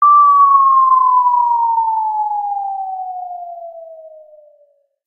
Fall - Rpg
Audio created in milkytracker, and various sound-editors, to sound like something falling from a high cliff.
This sound, like everything I upload here,
air, cliff, drop, dropping, echo, effect, fall, falling, free, fx, game, game-sound, rpg, sfx, slide-whistle, sound, sounddesign